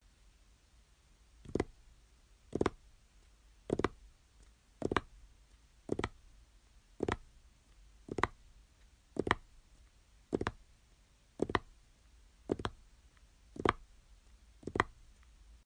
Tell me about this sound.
Tapping Fingers
tapping my fingers